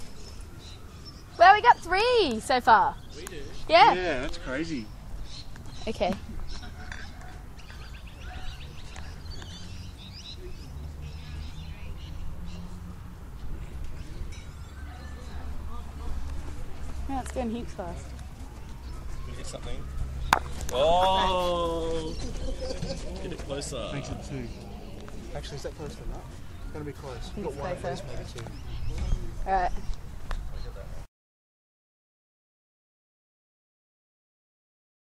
Recorded on an MP3 player using the voice recorder. Recorded at the Concord RSL Women's Bowling Club on a Sunday. Sound of two bowls hitting each other.